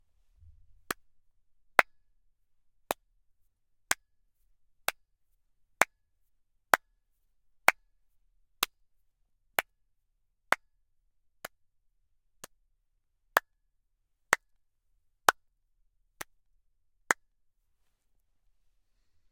Several handclaps. Recorded with Motu 896 and Studio Projects B-1. In the Anchoic chamber of the HKU.
field-recording, hand-clap